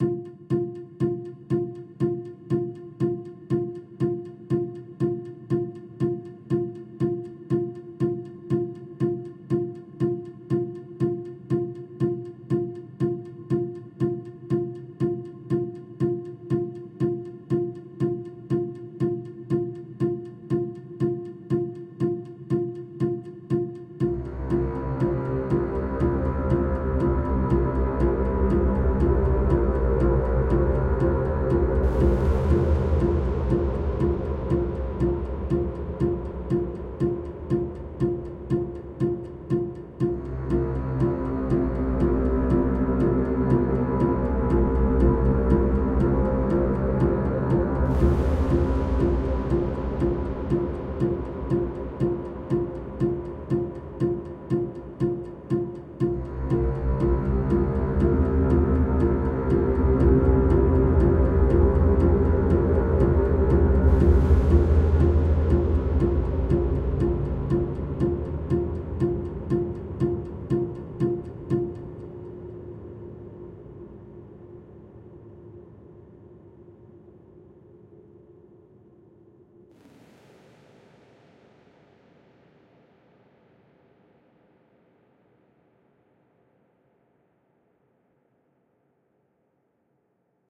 Orchestral Suspense Loop 1
Free!
120 bpm loop. Suspenseful and dramatic.
Horror, Spooky, Movie, Travel, Orchestral, Ambient, Scary, Music, Free, Atmosphere, Thriller, Passing, Chase, Being-Followed, Drone, Cluster, Snooping, Cinematic